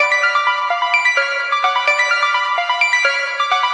sd-128bpm-G-Klimper1-Processed
This is the backing hook of one of my tracks (called second delight)
Some bells which are just too psychotic to be psychedelic.
recording is done through the access virus ti usb interface with ableton live sequencer software.
bells, electro, loop, psychedelic, psychotic, synth, techno